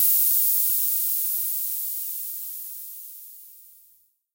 Vermona Hi Hat 6
From the Hi Hat Channel of the Vermona DRM 1 Analog Drum Synthesizer